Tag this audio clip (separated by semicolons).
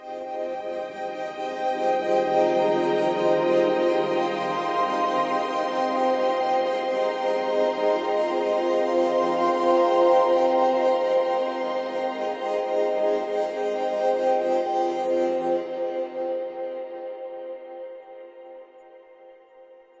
soundscape; pad; bass; sample; ambient; space